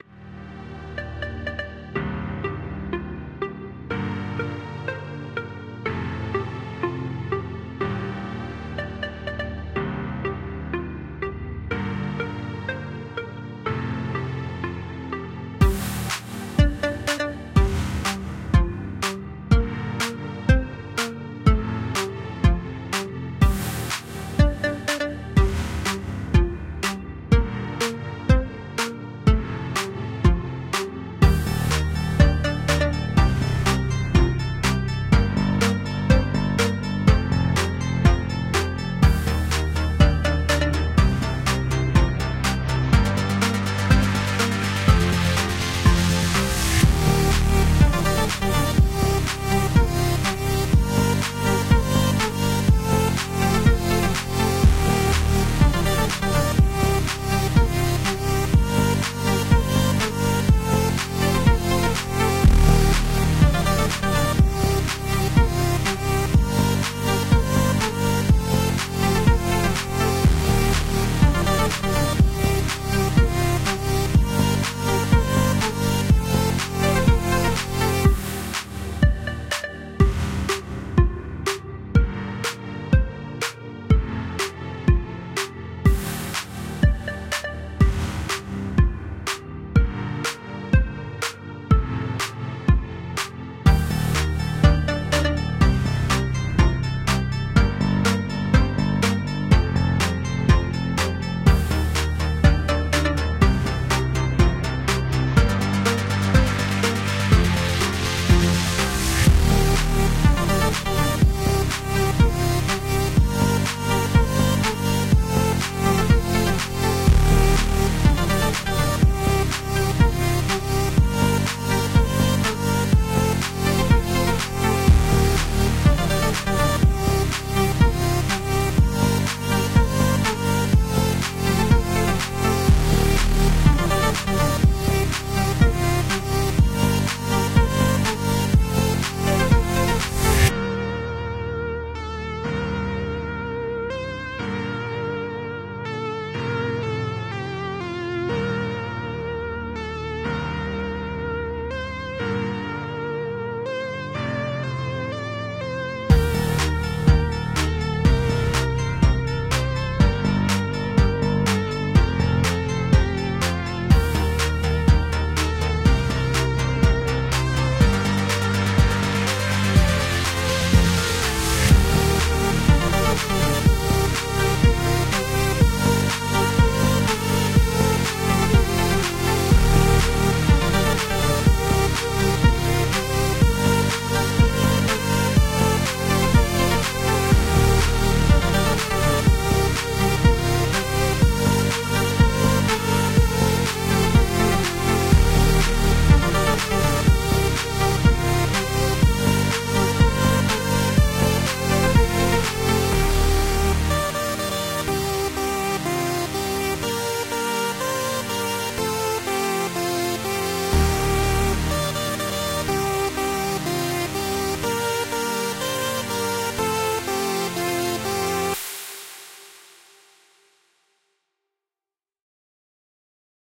A free edm song for you to use for whatever you want.
house, electronic, music, dub-step, techno, rave, free, trance, glitch-hop, electro, club